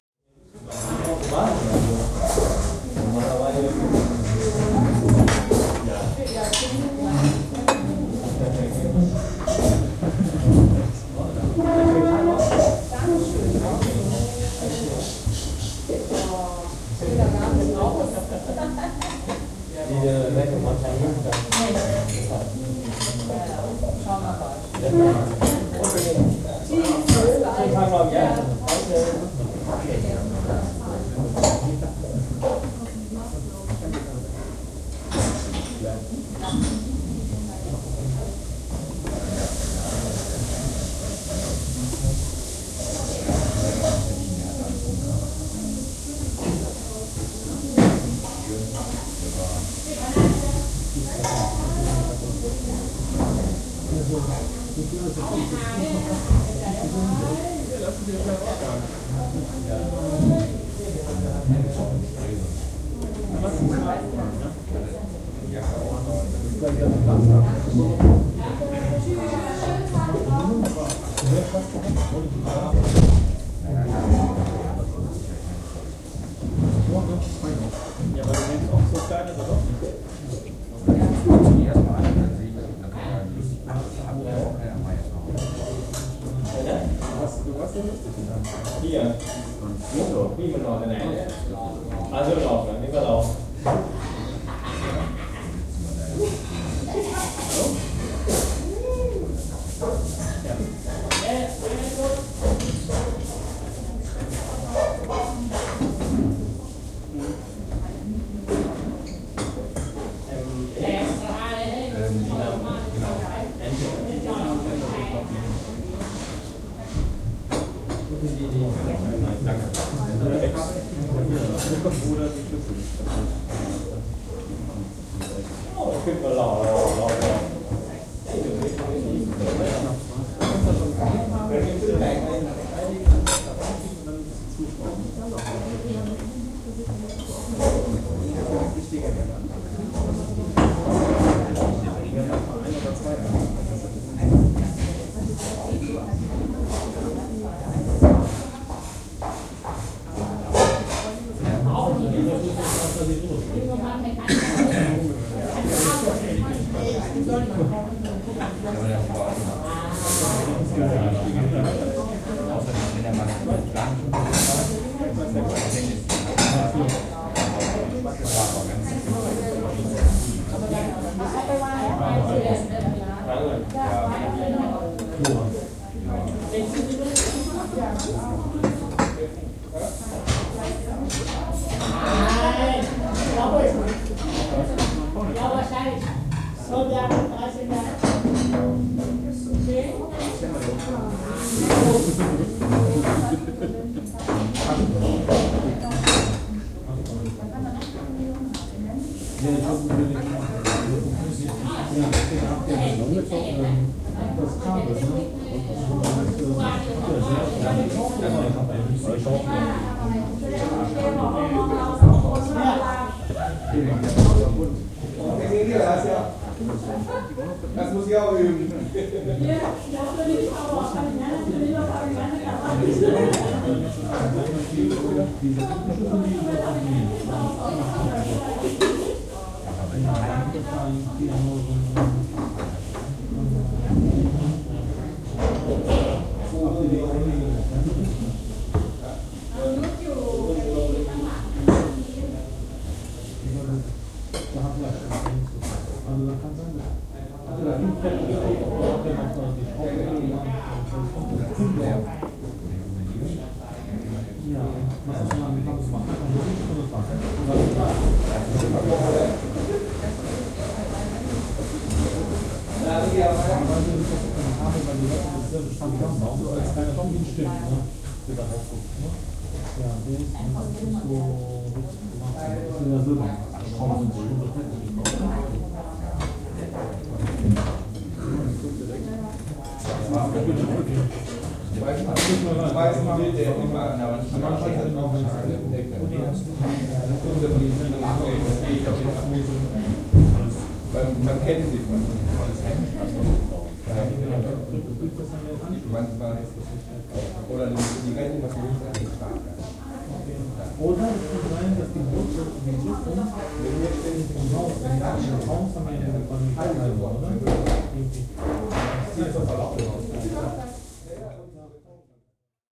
Ambience in a small Thai restaurant - people talking in German and Thai, sounds of cutlery, frying pan and door;
Recording Equipment: Fame HR-2